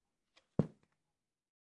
Laundry basket drop medium thick carpet
Dropping a laundry basket onto carpet. Recorded with an H4N recorder in my home.